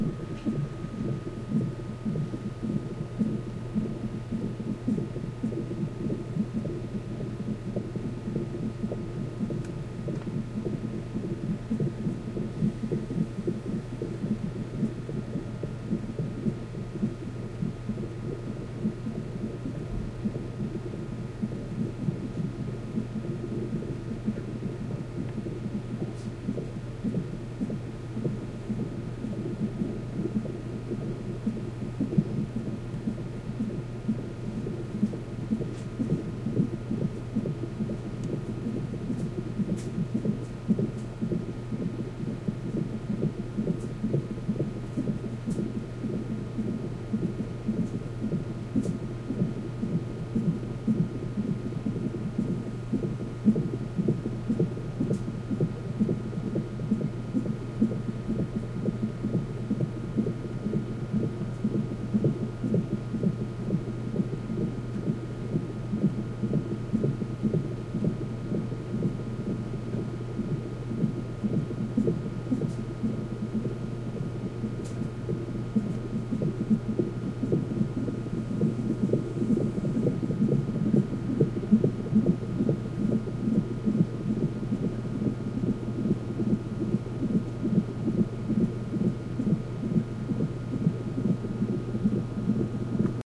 baby heartbeat 0414 6
Fetal heart monitor in delivery room before birth of a baby recorded with DS-40.
baby, pregnancy, birth